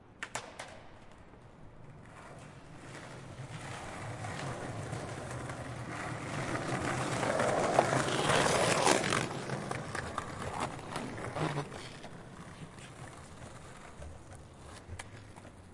The sound of skate boards that i take for my video project "Scate Girls".
And I never use it. So may be it was made for you guys ))
This is All girls at one sound
board, creak, group, hard, many, riding, skate, skateboard, skateboarding, skating, together, wheels, wooden